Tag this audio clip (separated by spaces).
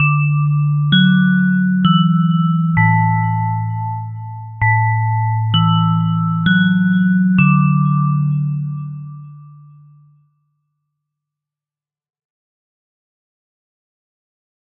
bell bells school ring school-bell ringing